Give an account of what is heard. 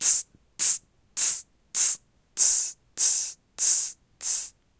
Hats 01 straight
beatbox, bfj2, dare-19, hat, hit